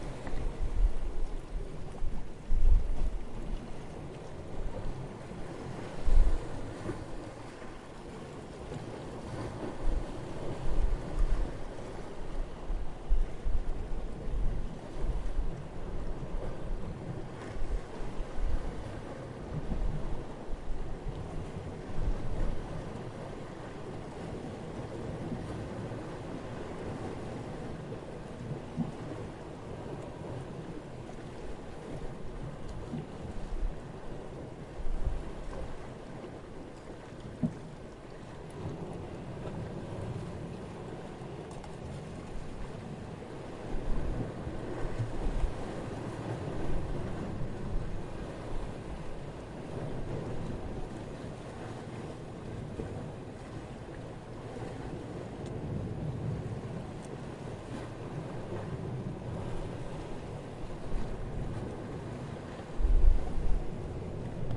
The sound of waves far away from a seawall